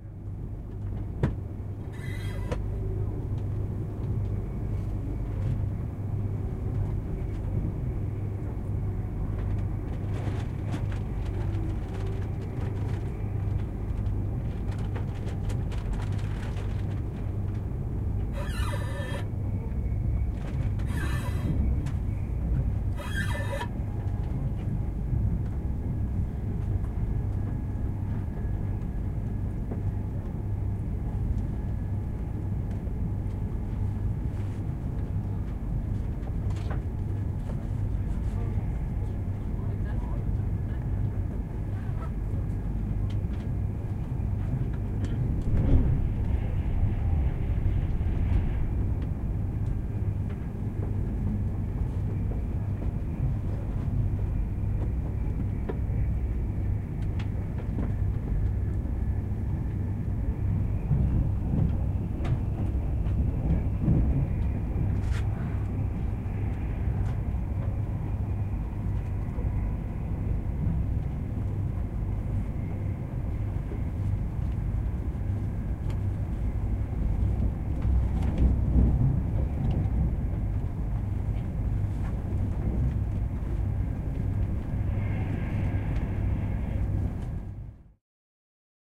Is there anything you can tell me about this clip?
The sounds inside a train compartment - creaking train, a deep hum or rumble from the movement, the train passing through a short tunnel, and of course, the engine. Recorded on the Doncaster to London Kings Cross 07.55 train.